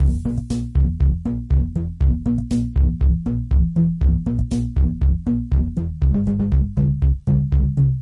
Lofi recording, analog Yamaha MR10 Drum Machine raw beat with virtual analog synth. 80's classic drum machine. Grimey, distorted.
Lotek, Analog, Battery-Powered, Silly, Lofi, Beat, Retro